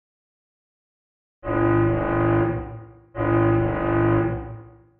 i dont know what i did for this sound but it sounds like a shiphorn and it is made from a bottle sound.....